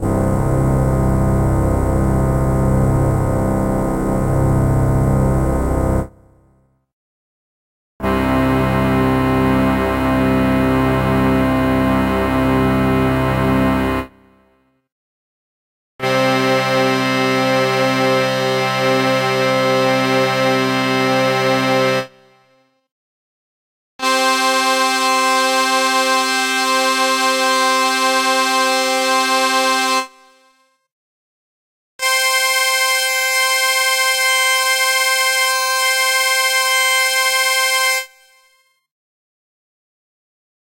EVOLUTION EVS-1 PATCH 072
Preset sound from the Evolution EVS-1 synthesizer, a peculiar and rather unique instrument which employed both FM and subtractive synthesis. This organ sound is a multisample at different octaves. The sound is reminiscent of a large Wurlitzer or church organ and has a very strong perfect fifth element which may not work with tight chords.